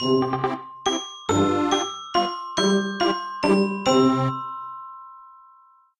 Tacks Interlude
glockenspiel, jingle, short, accordion, motif, melody, adventure, guitar, tack, kids, interlude, music